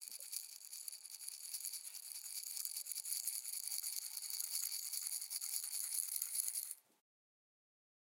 salt and peper shaker
short audio file of a salt and pepper shaker being shook